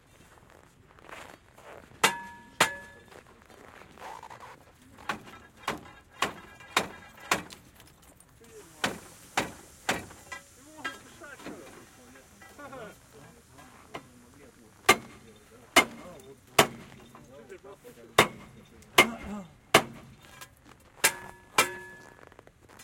Train station winter a man is breaking the ice on the wheels.
Mic: Schoeps mstc64 ortf Stereo
Recorder: Zaxcom Nomad
Date winter 2013